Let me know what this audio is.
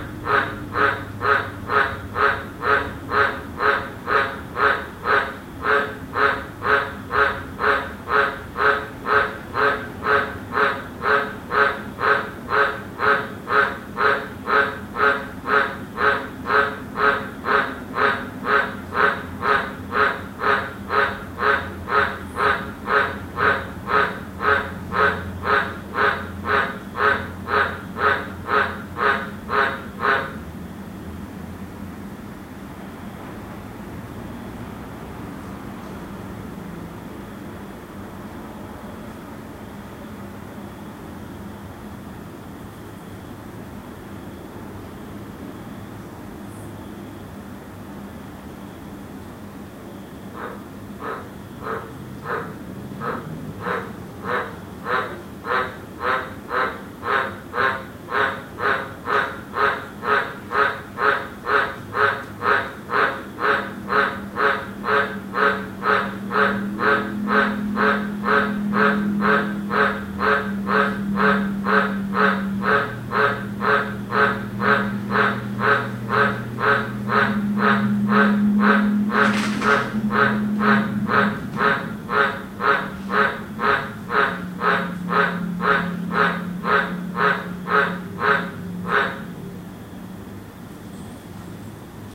Brisbane Ambience Cane Toad
Recorded after heavy rain in the backyard, some traffic in the background. Cane toad call.
Equipment Rode Podcaster to MacBook Air and normalised in Audacity.